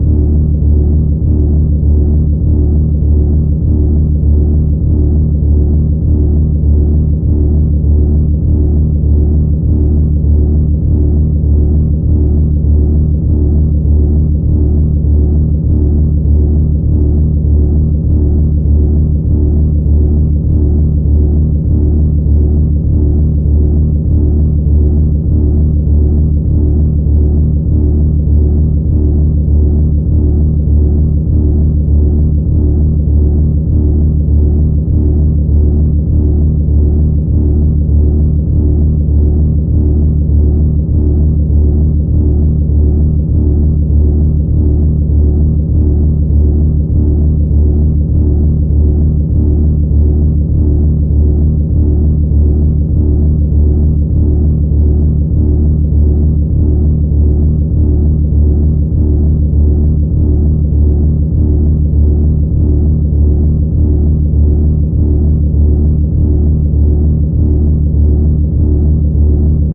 Cinematic Bass Atmosphere
A stereo bassy cinematic rhythmic/pulsating atmosphere. Loopable.
atmosphere, cinematic, drone, loop, pulsating, rhythmic, stereo